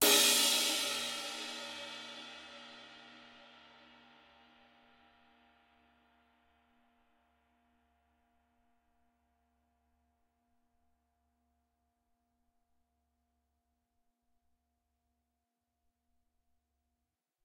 20" stagg sh ride recorded with h4n as overhead and a homemade kick mic.
crash, h4n, cymbal